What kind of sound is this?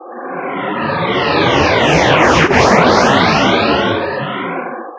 Energy sound created with coagula using original bitmap image of myself.
ambient, synth, space